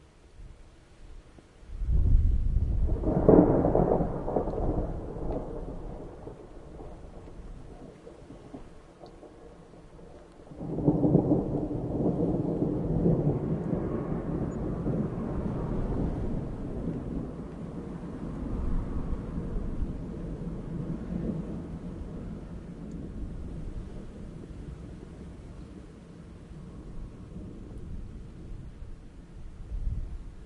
Thunderandcar June2006
sony ecm-ms907,sony mindisc; thunderstorm with car passing and rain.
car, fieldrecording, nature, rain, storm, thunder, weather